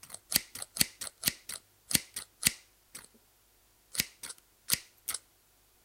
Scissor at work